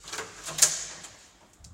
Door Percussion 01
Sound of a door closing
Percussion, Corridor